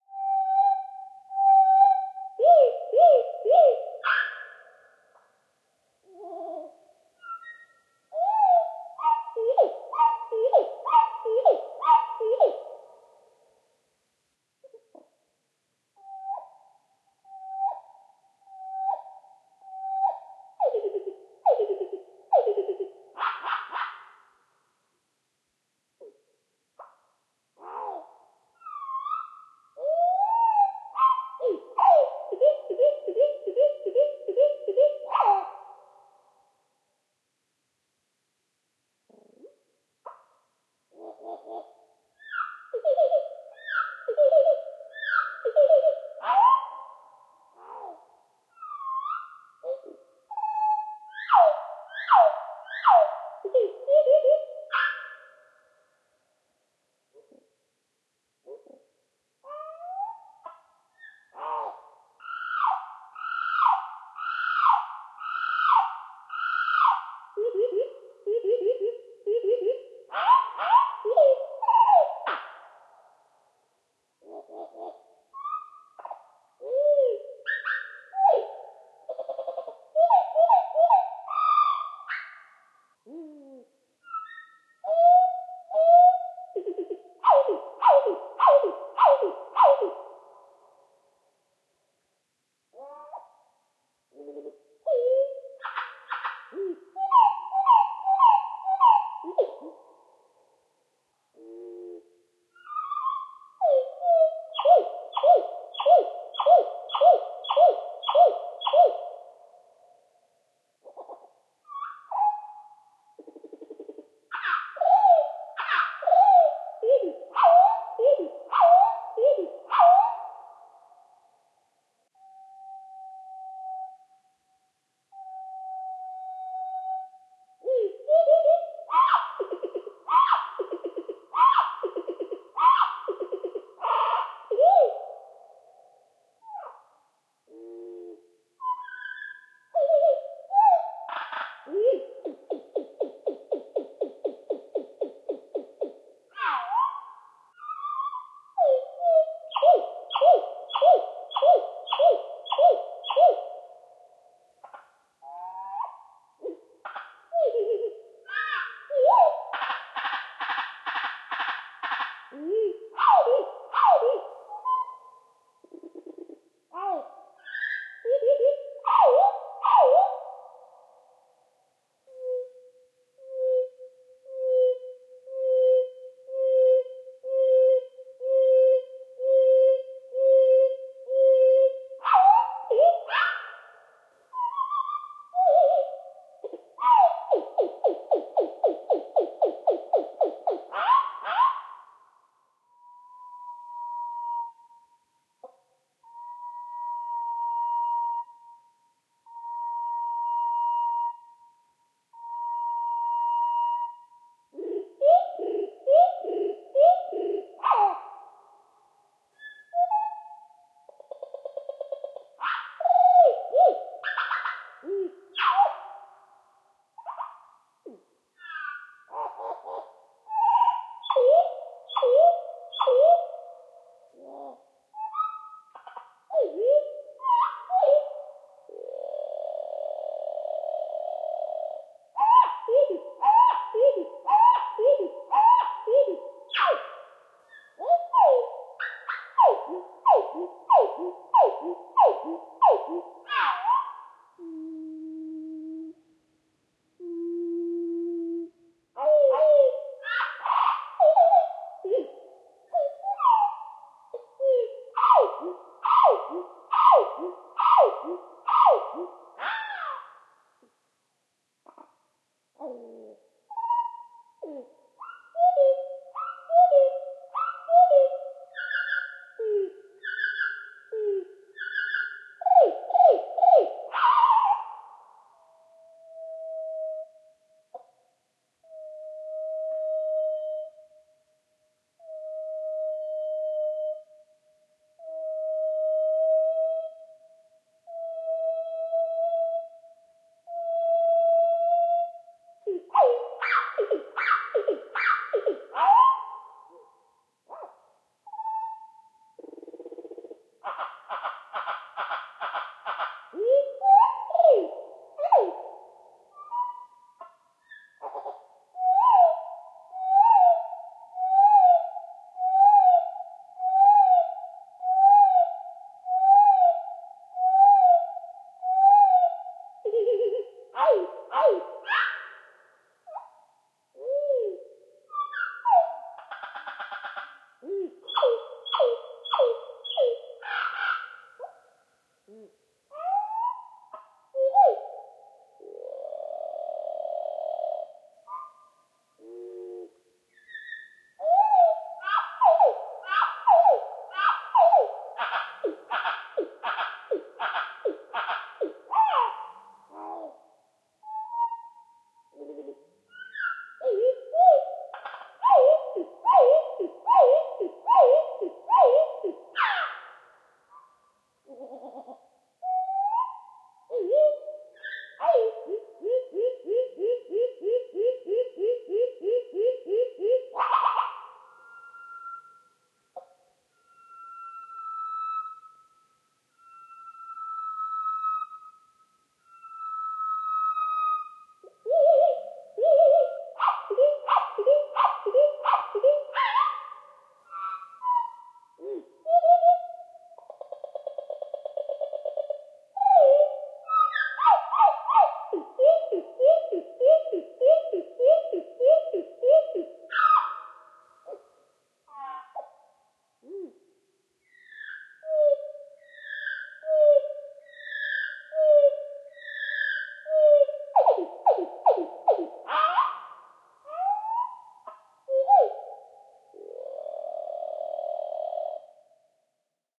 This is a nightingale in a very quiet environment, which is played 4 times slower. The gaps between the verses are removed, otherwise there would be always round about 20 seconds silence. The reverb is natural (no sound effects added), but sounds epic after slow downed with factor 4.
Recording distance to the nightingale was 5-6 meter.